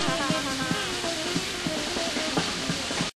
nyc washsquarejazzfountainsnip
Snippet from fountain perspective of ambiance recorded in Washington Square in Manhattan while a saxophonist and a drummer improvise and the fountain hosts strange modern art performers recorded with DS-40 and edited in Wavosaur.
new-york-city, jazz, washington-square